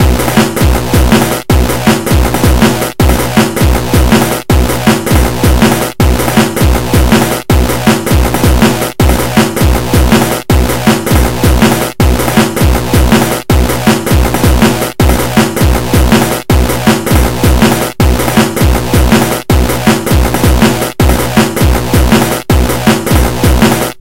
I used BDX for the kick, sent through Disto-FX and various EQ plugins.
Cymbalistic was used for the hihats, with further EQ and gating placed on it.
The snare was from a free sample pack (I can't remember the name of) sent through a resonator, EQ plugins and some soft-clippers to give it a bigger sound.
Clipmax was used on the master channel.